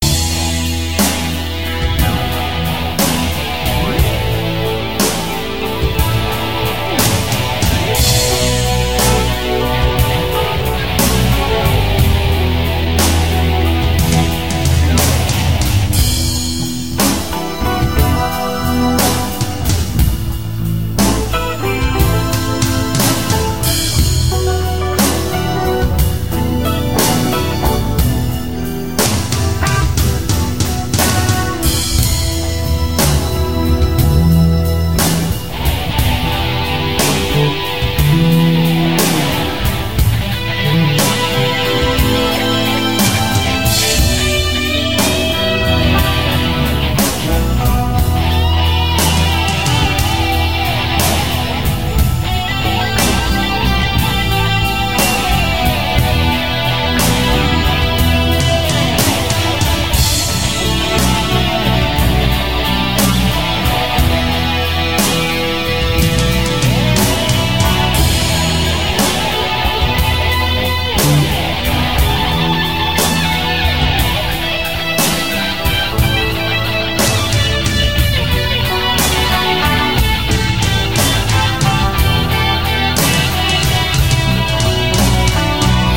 I created these perfect loops using my Yamaha PSR463 Synthesizer, my ZoomR8 portable Studio, Guitars, Bass, Electric Drums and Audacity.
All the music on these tracks was written by me. All instruments were played by me as well. All you have to to is loop them and you'll have a great base rhythm for your projects or to just jam with. That's why I create these types of loops; they help me create full finished compositions.